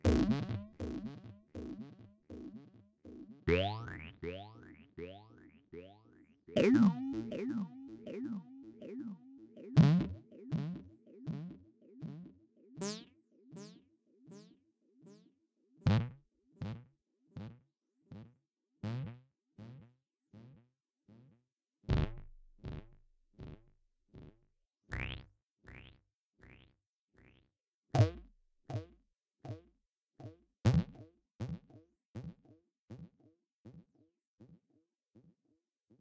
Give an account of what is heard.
Sci-fi Bounce
I imagine a sci-fi space frog jumping around. Good luck with that thought.
bladerunner, boing, bounce, delay, fiction, freaky, frog, funny, jump, science, scifi, sound, spring, strange, stranger, things, weird, wobble